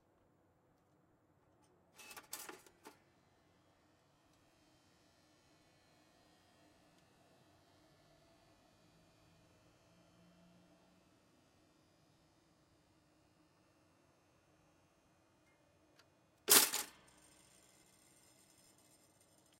Toaster start and stop
a toaster being turned on and off
toaster, turn-on